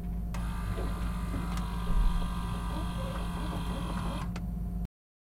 Motor Whir
A whirring electric motor.
motor, whir